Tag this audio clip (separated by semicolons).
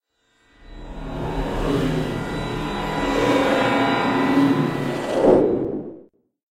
arcane; spell; invisibility; spellcasting; invisible